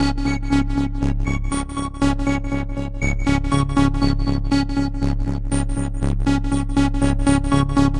Odd little synth loop.